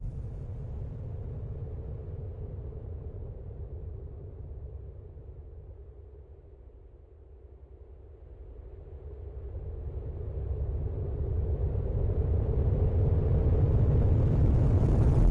A processed electric ambiance that might work well in a horror film.